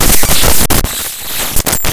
Glitch Element 17
Glitch production element sourced from an Audacity Databending session
databending, raw, data, glitch, production-element